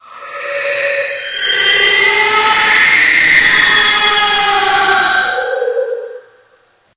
Creepy Ghost Scream
Creepy, Halloween, eerie, ghost, haunted, horror, scream, sound, spooky